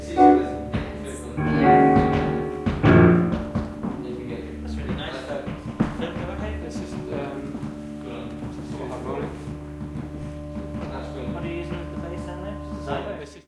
Rehersal Noise 1
The gaps between playing - voices and random sounds at writing sessions, May 2006. Recorded using Sony MZ0-R90 Portable Minidisc Recorder and Sony ECM-MS907 stereo mic.
rehersal, studio